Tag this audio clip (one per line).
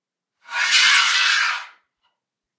Metallic
Metal
Movement
Scrape
Scratch
Slide